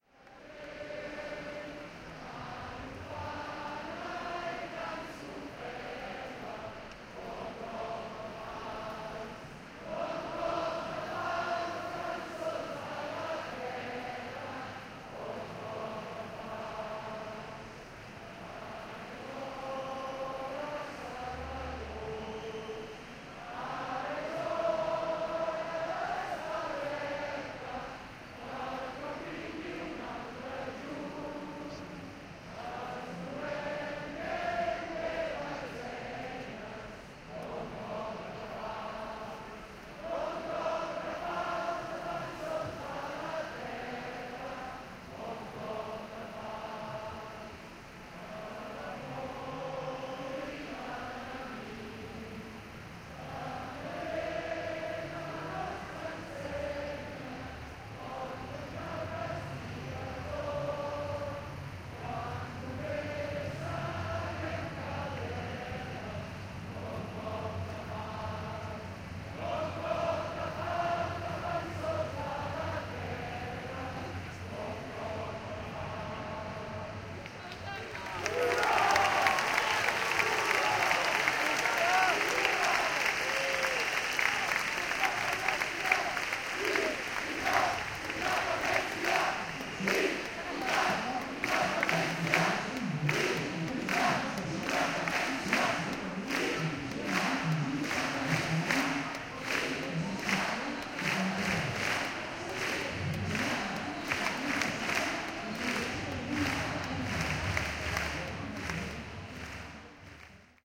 Binaural field-recording of Els Segadors, the National Anthem of Catalonia, sung at "V" rally in Barcelona, on September 11th, 2014. It's followed by people shouting "I-Inde-Independència" (freedom).
freedom, ambience, okm-II, zoom, binaural